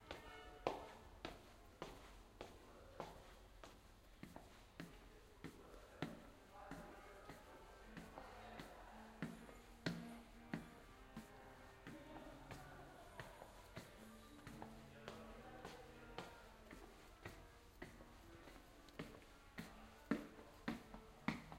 Walking around ESMUC
walk, ESMUC, step, feet, walking